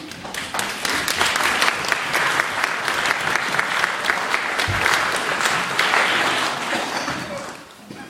This is a proof I still do 'real-world' recordings- not just electronic or edited ones. A large congregation applaud a children's choir at a church's Christingle service, 7 Dec 2014.